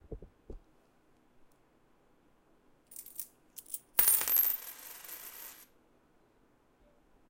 This is the sound of coins dropping on a counter top .
money, coins